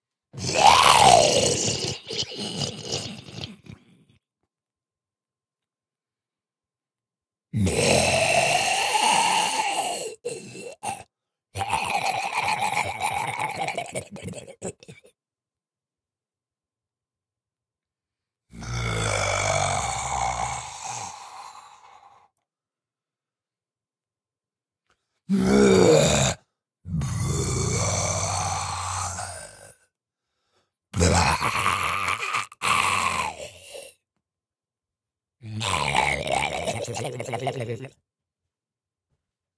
Guttural Monster Noises
Just me making some horrible noises with my mouth, and then putting them on the internet, like a normal normal human.
growls, horror, scary, beast, monsters, zombie, roar, cthulu